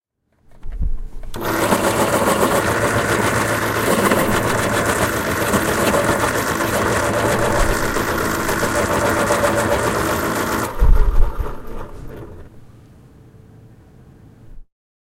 Recording of an electric pencil sharpener.